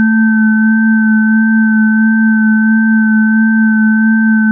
Bell Sound
Sounds Will Be Done Boys